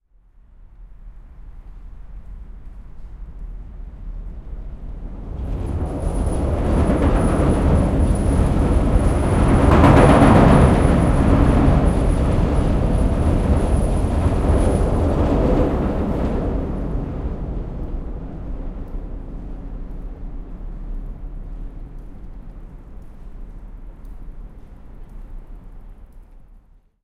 0308 Train over bridge from below 2
Train, metro passing over the bridge, recording from below the bridge.
20120616
field-recording, korea, metro, seoul, train